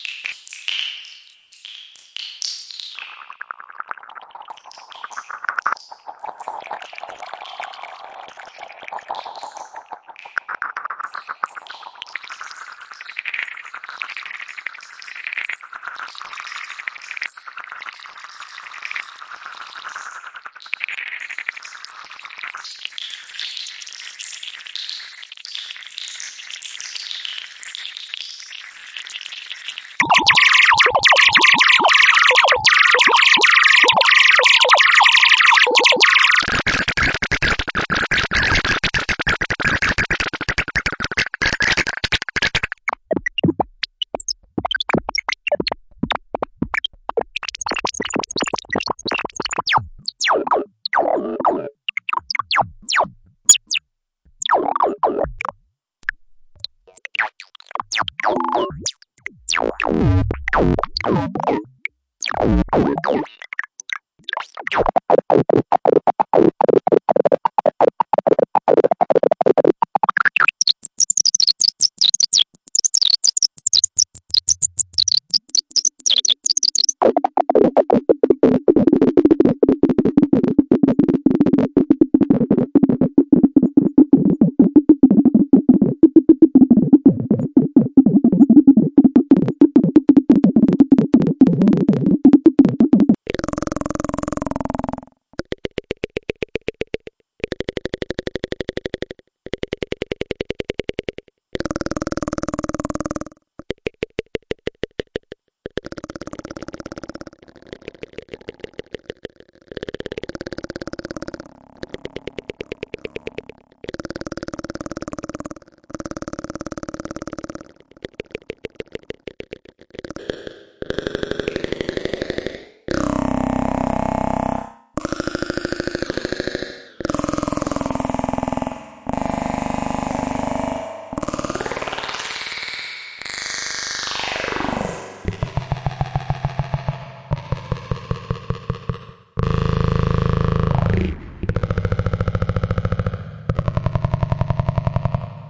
microsound workshop
Created and formatted by Walker Farrell for use in the Make Noise Morphagene.
Utilizing a Make Noise modular system to create short recordings of pulsar, glisson, and trainlet synthesis, and recorded into the Morphagene for modulation, layering and re-sampling.
Video Demonstration here!